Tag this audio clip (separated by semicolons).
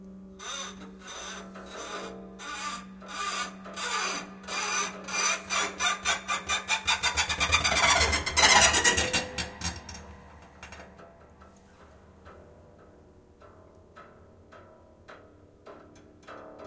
fx; sound-effect; piano; acoustic; effect; sound; industrial; horror; soundboard